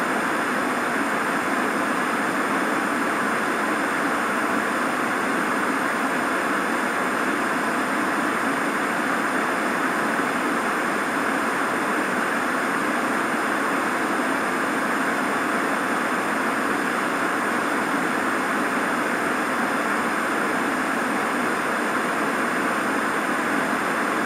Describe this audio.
No more cable boxes... this is where the good channels used to be recorded with laptop and USB microphone in the bedroom.